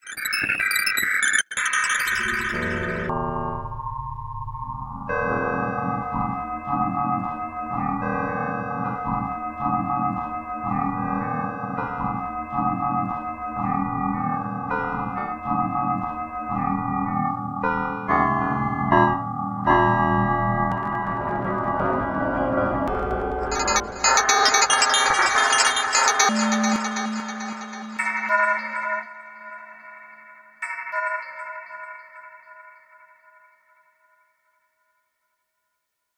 keys 001 crazyefx
some keyboard sounds with freaky attitude
dub, efx, experimental, key, reaktor, sounddesign, synth